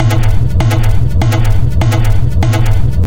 useful bouncing sound